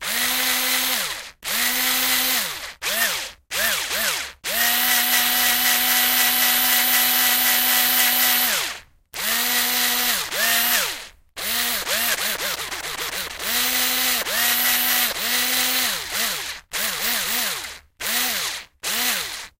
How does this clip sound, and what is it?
This is a cheap electric screwdriver being toggled between forward and reverse.
electric-screwdriver, tools, field-recording, electric-motor, gears